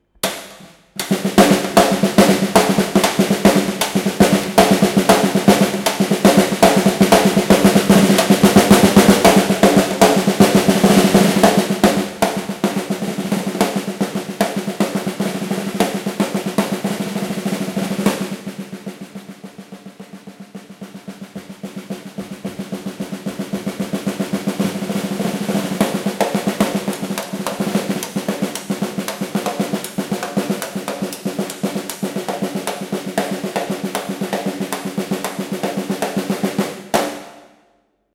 A random live drum recording..Recorded using a Zoom H4N